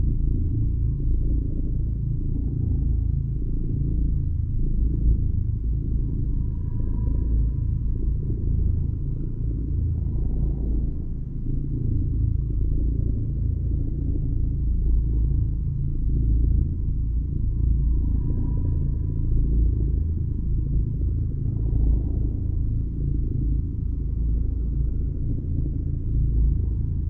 kerri-cat-mix-loopable
This is fully loopable version of it (no fade in/out needed). Additionally - it was remixed with the same sound, but swaped (and shifted channels), to make the sound more centered/balanced.
animal, cat, loop, purr, remix, texture